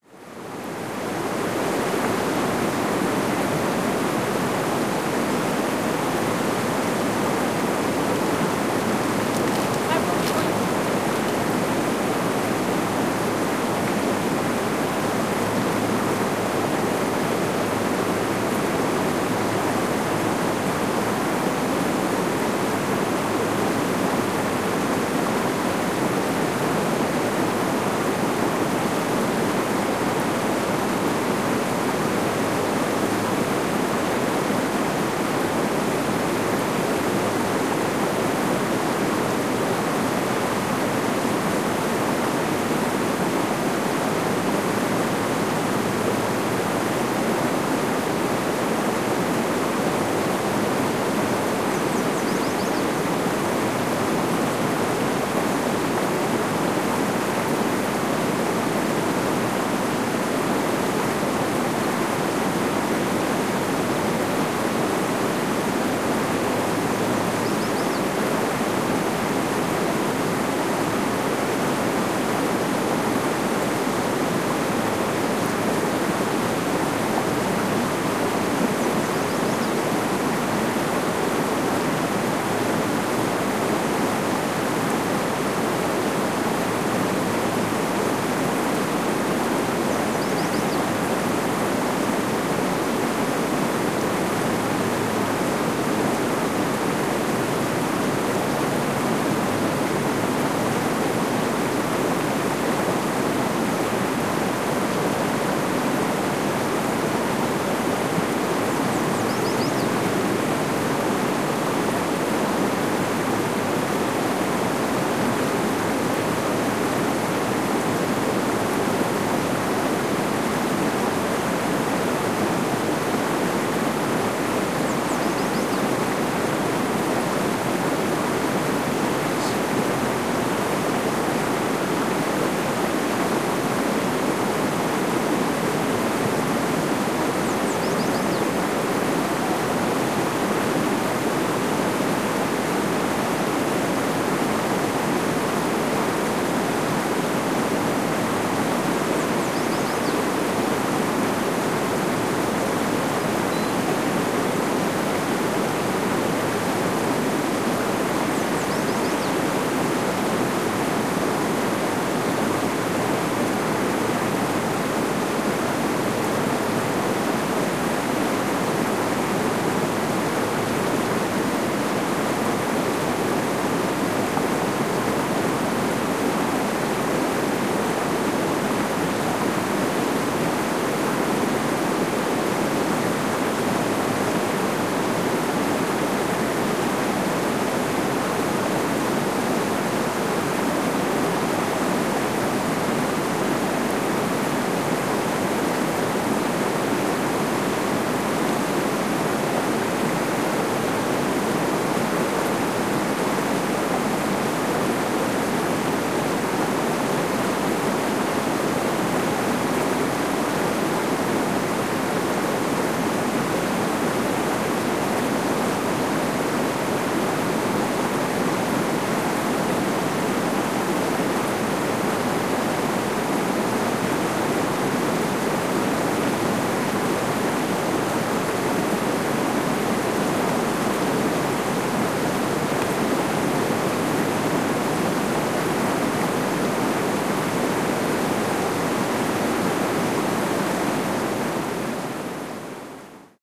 the sound of rushing rapids in a medium-size river
ambience, ambient, birds, field-recording, nature, rapids, river, stream, water